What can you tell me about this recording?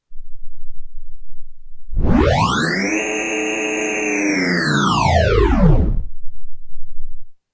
Series of industrial sounds from a virtual machine shop. Created in Granulab using real time adjustments of grain frequency, pitch and amplitude via midi and mouse. This one is dedicated to Elevator Ed.
elevator-ed, granular, industrial, machines, synthesis, texture